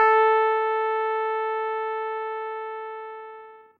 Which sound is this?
mt40 ep 081
casio mt40 el piano sound multisample in minor thirds. Root keys and ranges are written into the headers, so the set should auto map in most samplers.
digital, keyboard, multisampled, synthesised